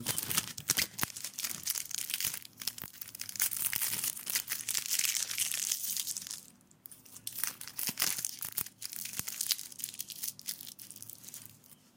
Sellotape noise
Sellotape being used and thrown away
OWI, Sellotape, shredding